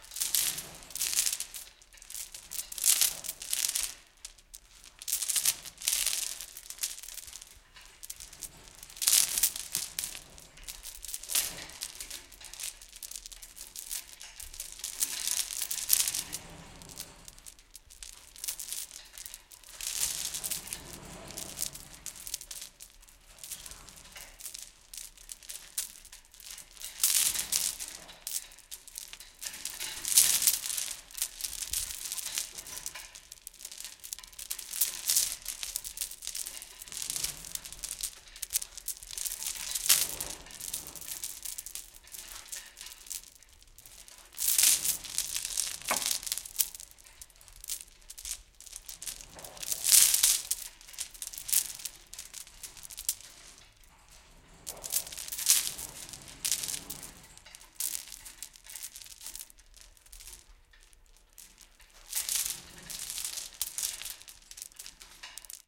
metal shop hoist chains thick rattle clack slap2
chains, hoist, metal, rattle, shop, thick